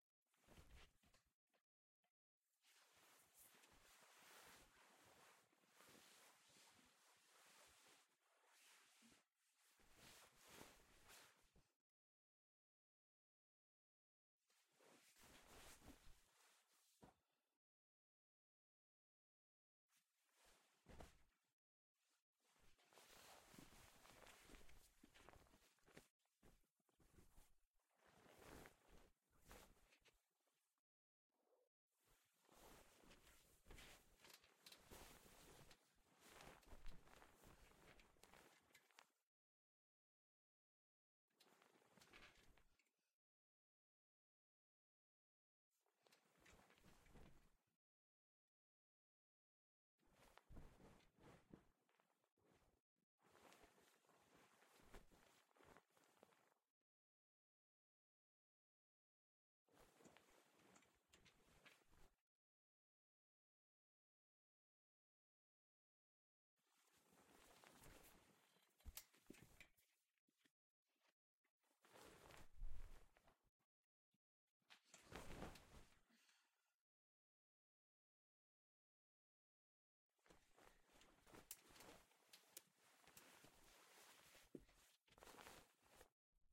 Shifting in bed
The sound of a person climbing into bed, moving around, shifting, and then person climbing out.
rustle, climbing, into, out, jumping, sheet, bed, heavy, climb, sheets, matress, shifting, pillow, soft, turning, shift, clime, OWI, a, mattress, turn, back